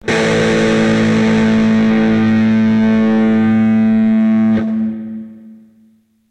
Power chords recorded through zoom processor direct to record producer. Build your own metal song...
guitar, multisample, power